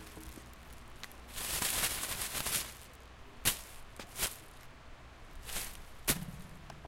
this sound describes how the rubbish bag moves and which sound it makes.